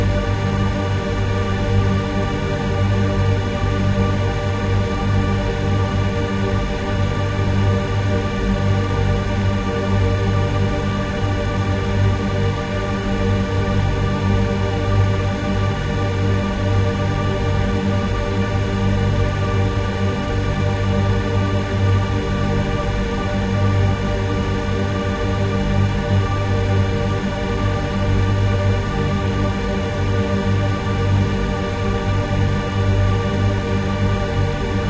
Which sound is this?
Frozen Reverb Ambient pad atmosphere (4)
Ambient, atmosphere, Frozen, pad, Reverb